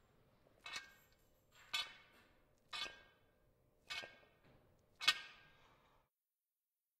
Lifting Zvedani cinky 1
Lifting the barbell.
bench-press, lifting, barbell